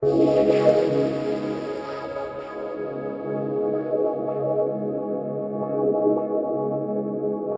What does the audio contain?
space hit
pad, space